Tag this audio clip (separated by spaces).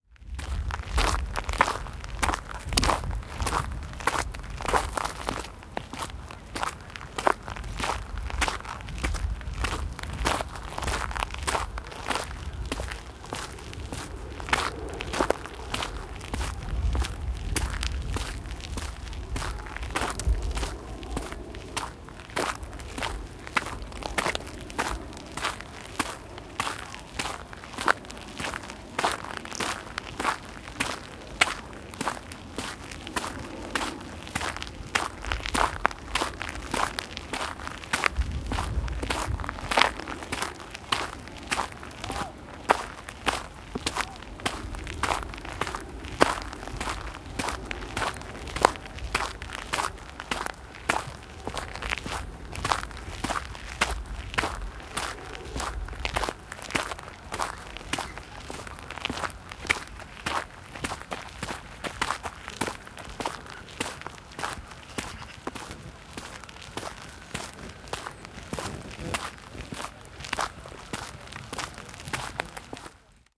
gravel stones walking dirt dust steps walk footsteps